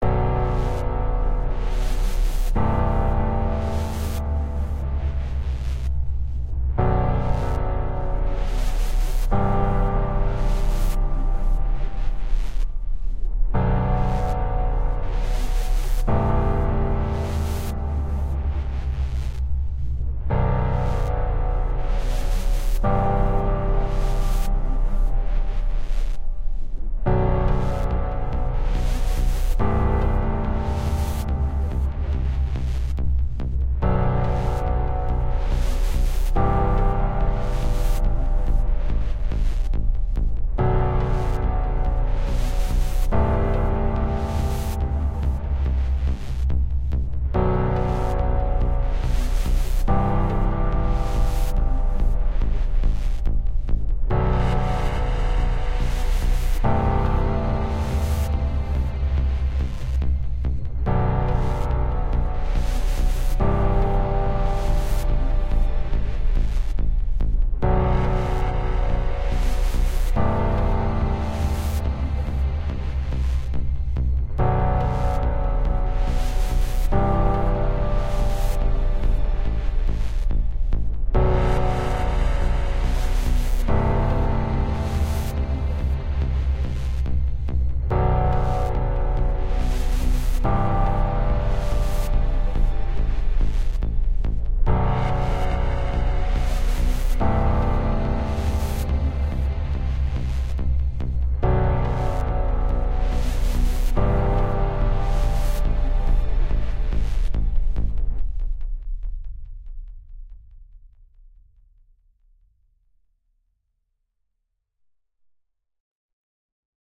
Psychopath Music
Title: Can't control
Genre: Drama
I was experimenting with the worst mode(scale) and it turns out to be the worst lol. Well, uneven scale makes it unique to the psychopath genre.